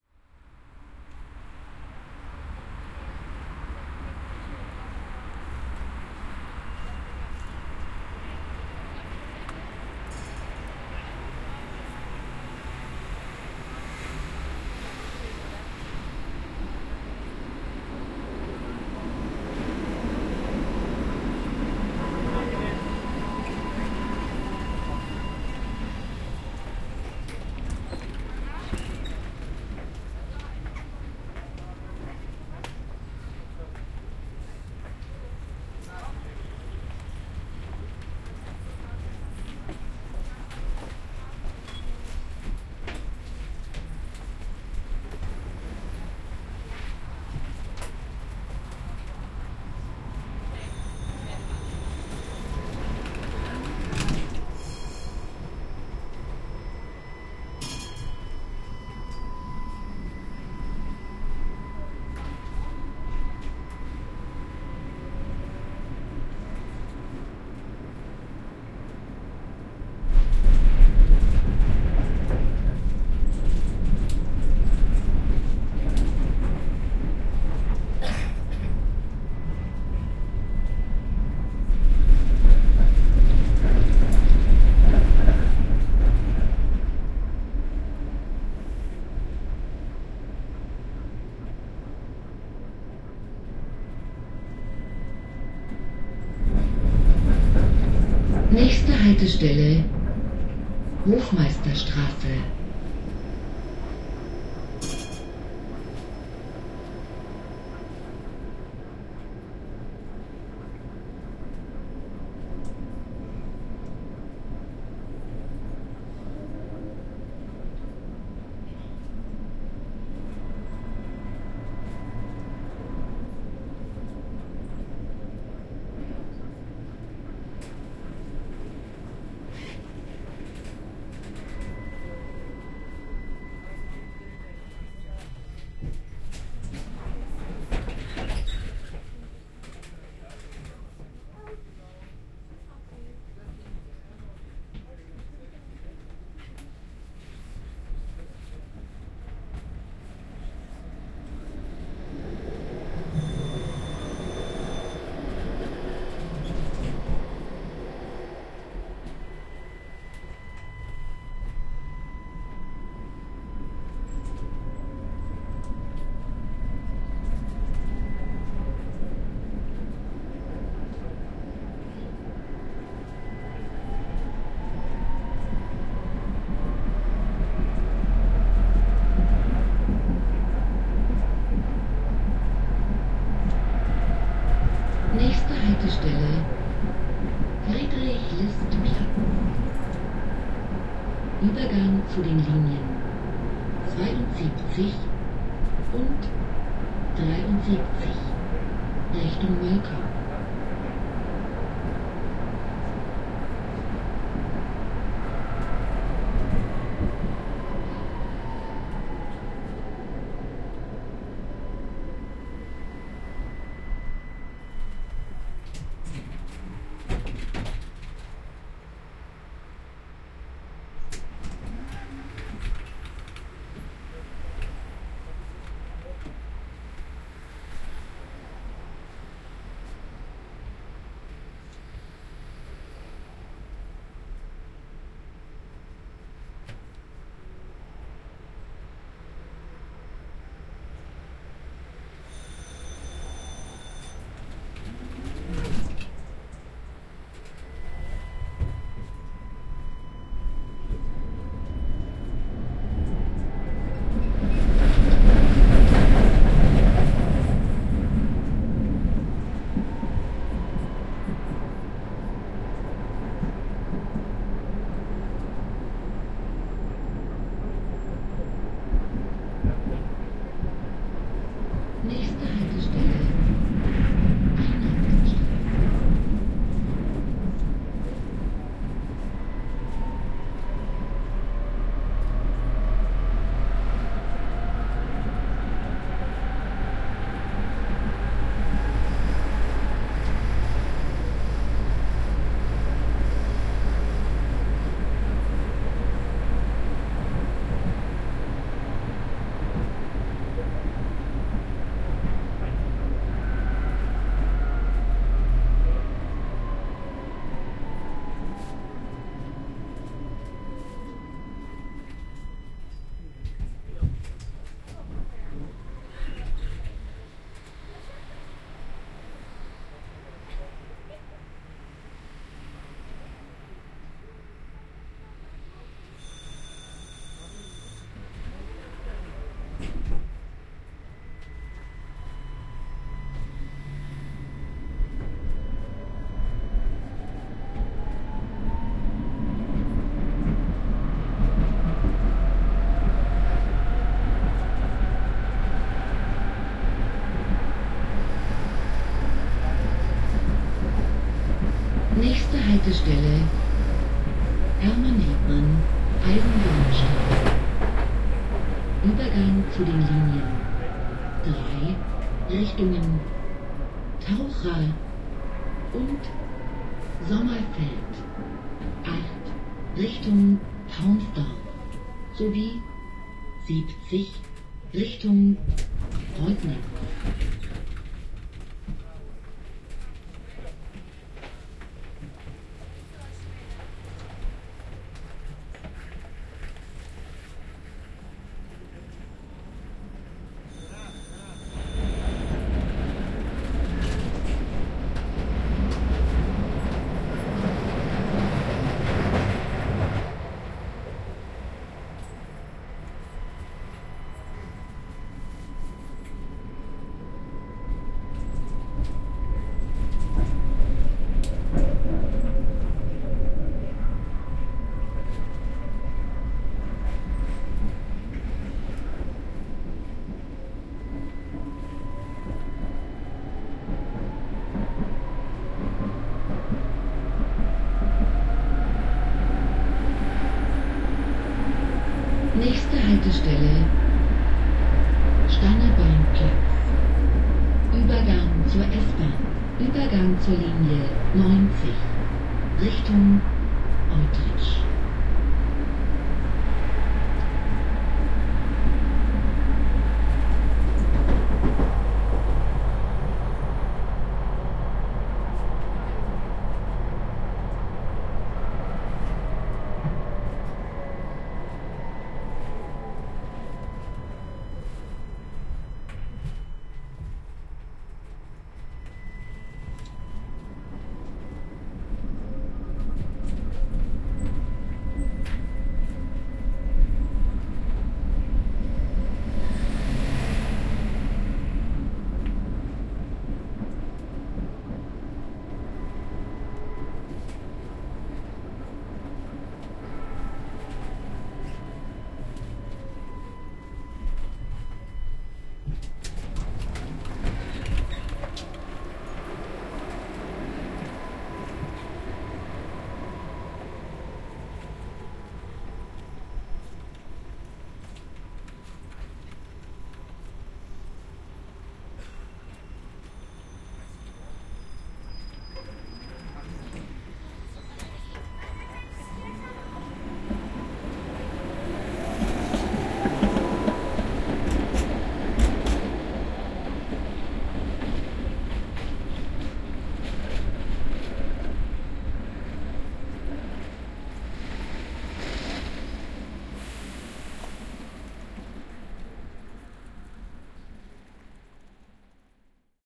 Leipzig, Germany, ride with old, rattling tram
They have been a familiar sight and sound since 1969 all around town in Leipzig, Germany: The old Tatra 4D tram cars. Some of them are still in use, though they have been modernized during the 90s.
I regard their rattling and jingling as a decaying sound, as they have to make way for newer trains, which have the advantage of being much quieter, being accessable also for wheelchairs, air-conditioned but at the same time have much less character within their sonic fingerprint. One thing I at the same time value and cringe to is that they even sampled the old trains' characteristic bells for the new ones. At least I simply do not believe that those bells are still real, they sound sterile to my ears.
This recording is a short tram ride. You can hear the tram approaching (the jingle signal at the beginning is that tram entering the station) and I enter the first car. I keep standing next to the middle door, facing driving direction.